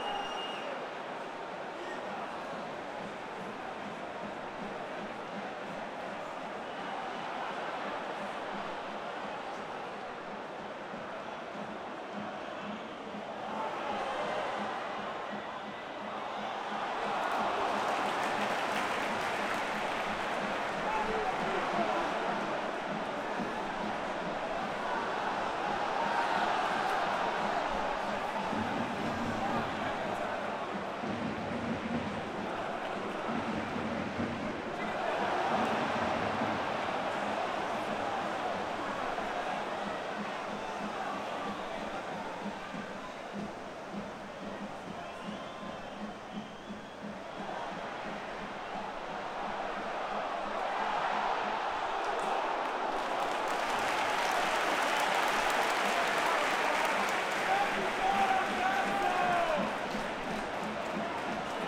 Recording of the atmosphere at the San Siro Stadium. AC Milan vs Internazionale.